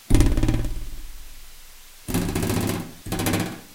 Vibrating metal ruler on cake tin
metal, tin, metallic, Vibrating, ruler